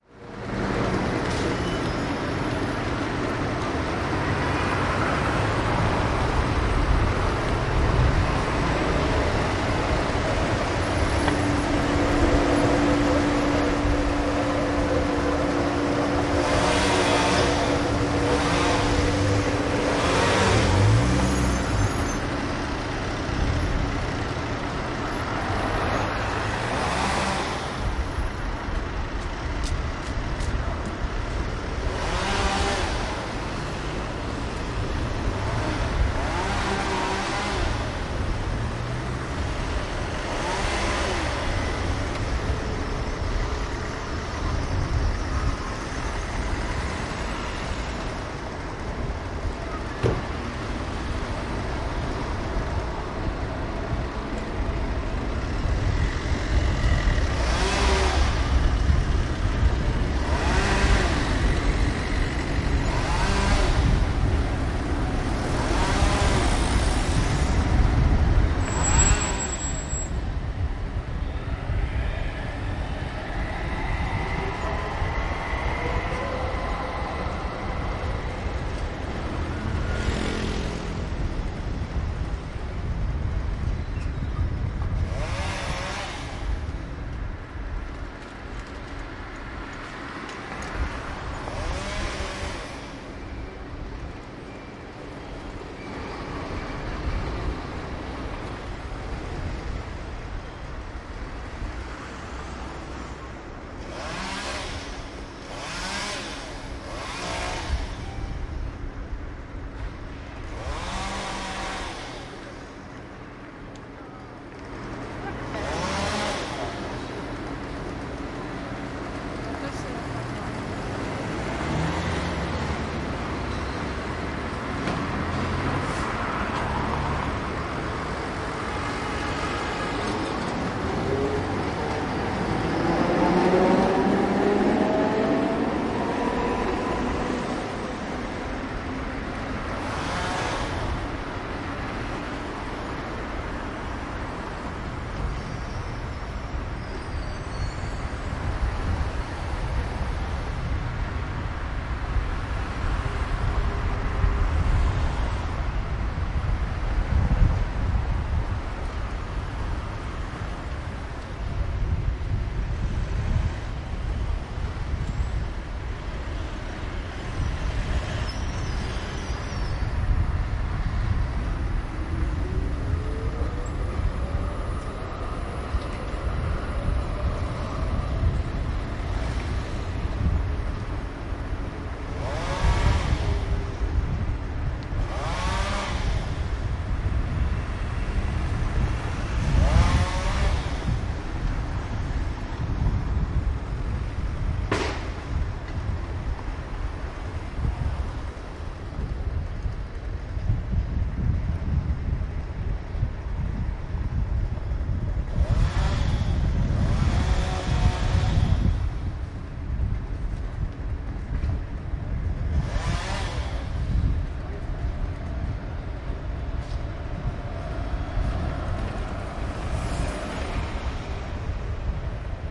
Noisy street ambient
Field recording on the corner of a medium sized square in Torino, northern Italy. Workers cleaning some trees, cars and trams passing. Some people. Recorded with a Zoom h1.
cars, street-noise, urban, field-recording, street, city